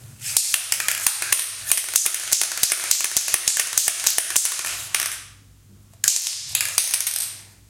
Paint can shaken vigorously. Audiotechnica BP4025 into Sound Devices Mixpre-3